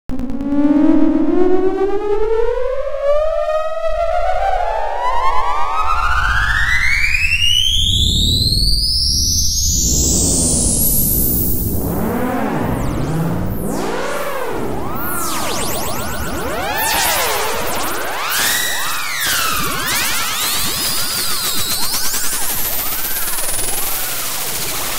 Weird chirp
After some time with messing around with an audio expression evaluator just with some basic trigonometry sin and cos expressions I came up with this. Not sure what to call it but it has a creepy effect to it. Hopefully someone will get use out of it.
The expershion that was used was
"sin(2*pi*(100*9.78399 + (exp(9.78399*ln(200-100)/10)-1)))"
of course this is the raw sound i edited it and ran it through some filters as well.